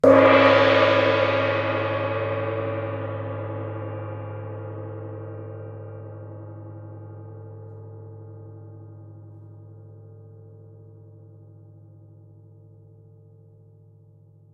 Loud 3 cymbally
A loud gong strike akin to a cymbal
Cymbal, Gong-strike, Loud, Sample